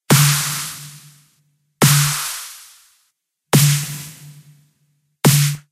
SNARE (EDM)
This is a decent snare I made on my own using a bunch of presets in FL Studio. I mixed and modified them in my own way.
pryda, edm